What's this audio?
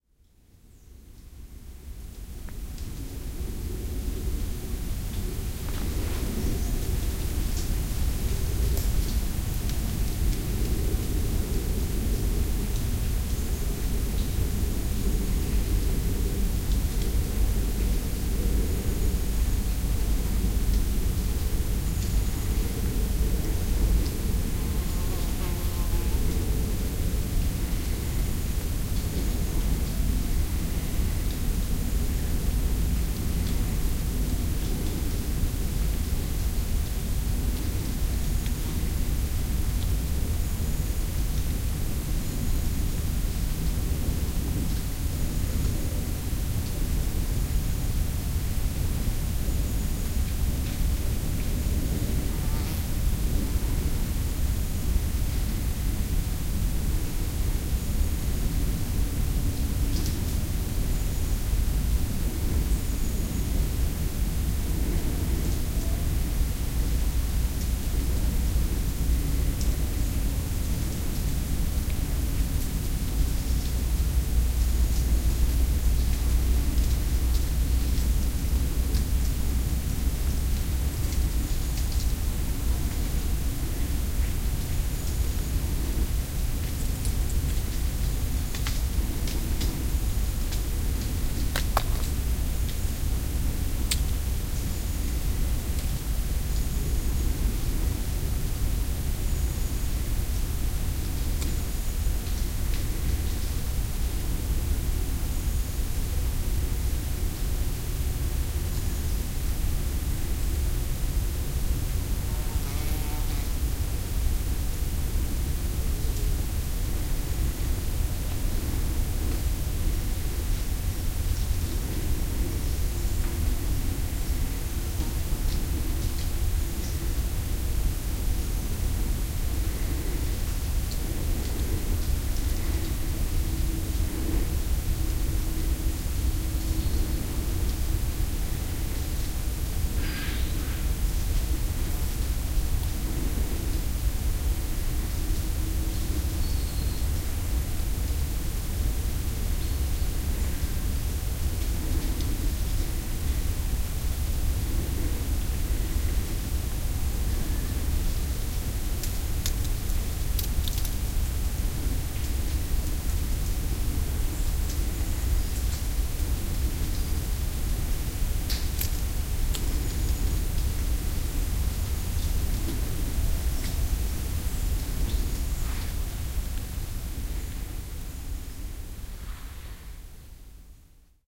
Les Feuilles Mortes141020
Combeloup, Grenoble. On field recording in a forest of autumn falling leaves, round 1 p.m. of Monday October 20 2014.
I seated on path border, under the tree of the photo, trying don't move. Better headphone listening.
duration 3'
ambiance, leaves